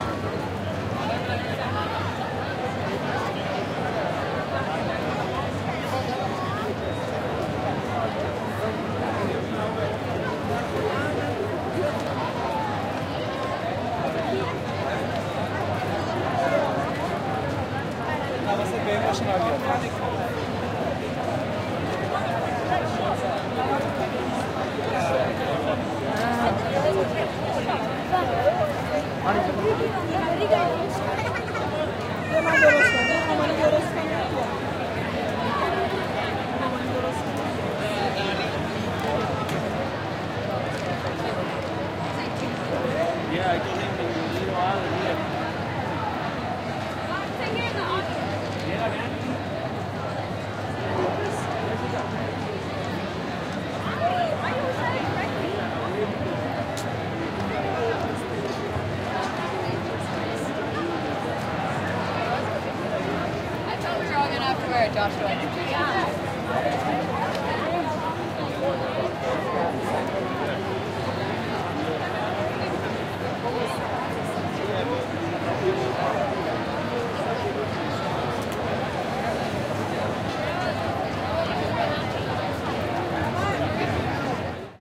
London Eye - Amongst Crowd Below
Atmos recording directly below the London Eye on a summer afternoon.
If you'd like to support me please click the button below.
Buy Me A Coffee